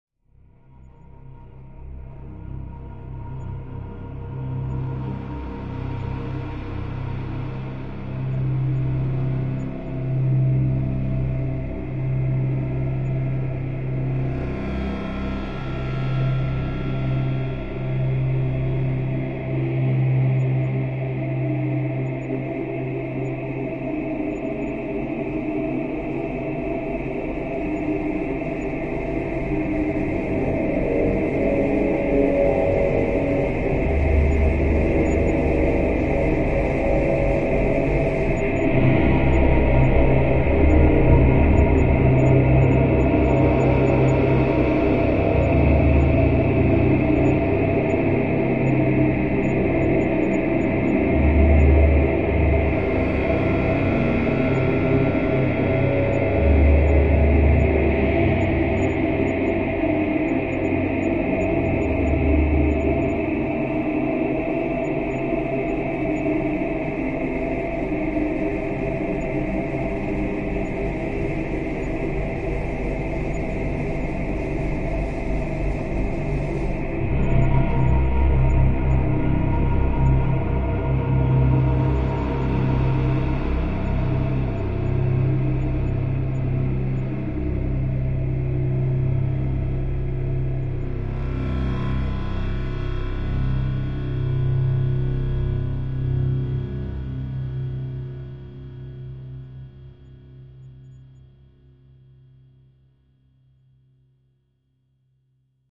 Drone Horror Music 03
Atmosphere, Ambiance, Horror, Dark, outdoor, Movie, Soundtrack, Spooky, Scary, Video-Game, Amb, Sound-Design, Wind, Mysterious, Game, Ambient, Abyss